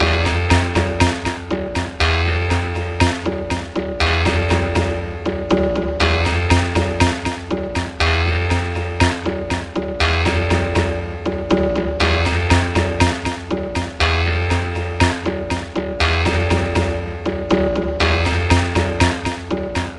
WD simpler conga dancehall
3 korg wavedrum samples arranged in abletons simpler. 2 are taken from the eastern dancehall instrument - the 3rd from the conga. slightly enriched with a beat repeater.
conga, eastern-dancehall, loop, polyrhythm, strange, wavedrum